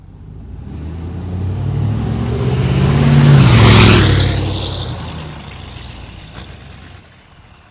Diesel Drive by #1 MZ000004
Ford F350 highly modified diesel engine with after-market turbo drives past, last pass.
Recorded with Marantz PMD660 & Sennheiser e835 Mic
Truck, Whistle, Turbo-diesel, Diesel, Turbo, exhaust, Tuned